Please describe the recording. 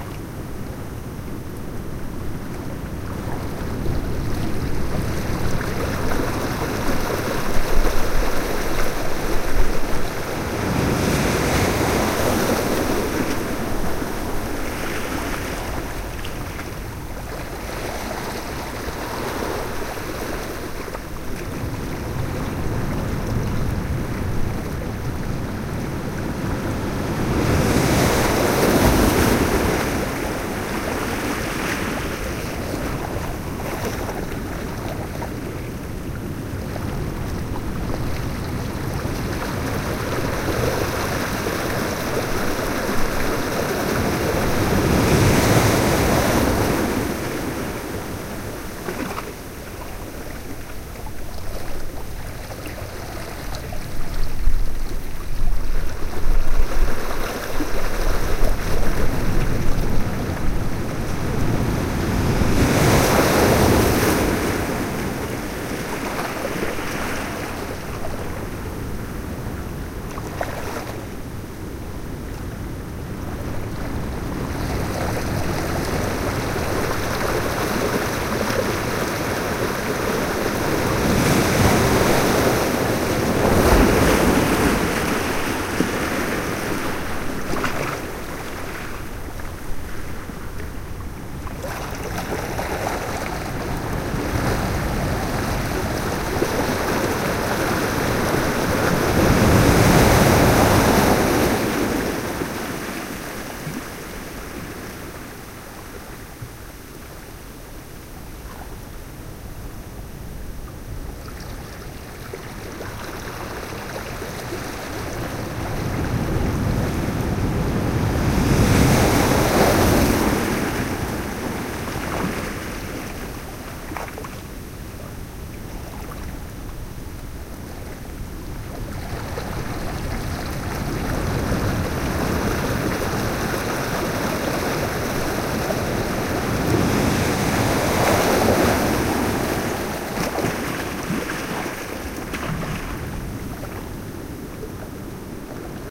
Ocean waves at Point Reyes. Edited as a loop. Using a Sony MZ-RH1 Minidisc recorder with unmodified Panasonic WM-61 electret condenser microphone capsules.
oceanwaves-9&10 are from different parts of the same recording and are edited to be combined and looped.
close, loop, Point-Reyes, seashore, splash, water, waves, wet